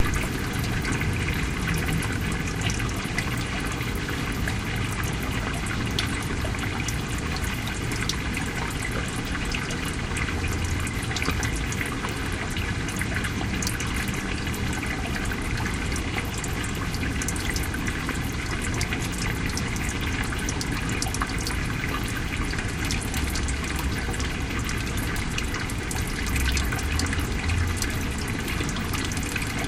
p garage drain1
Sounds recorded while creating impulse responses with the DS-40.
ambiance, field-recording